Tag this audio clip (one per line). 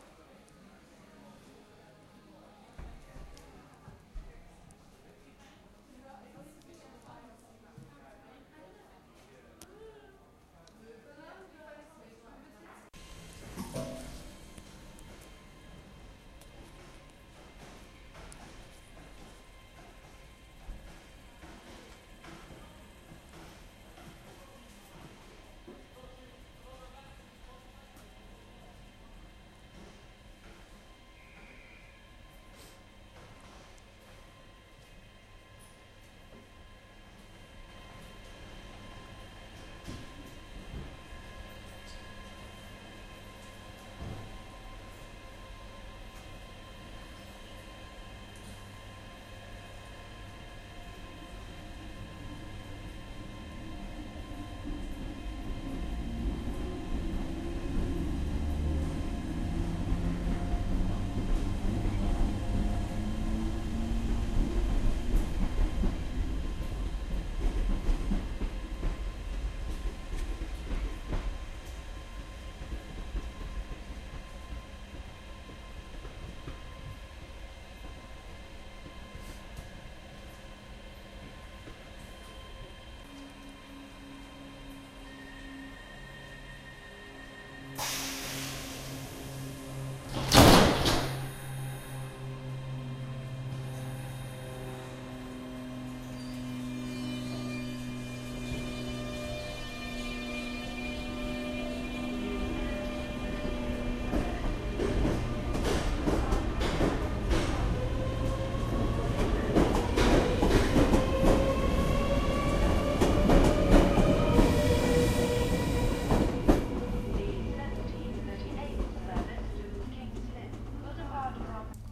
station
railroad
departing
cambridge
train